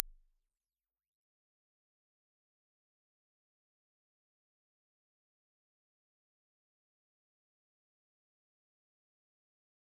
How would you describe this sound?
Reference Sweep
Finnvox, ir, impulse